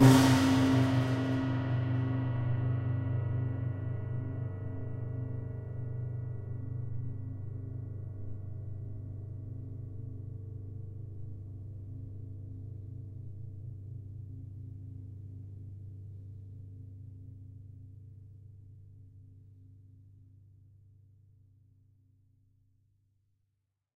Crashing stinger#2
A basic stinger made by recording a prepared upright piano. Recorded with a pair of AKG C480's and a Neumann TLM 103 condenser microphone.